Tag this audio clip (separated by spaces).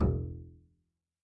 Instrument Standup Acoustic Plucked Double Bass Upright Stereo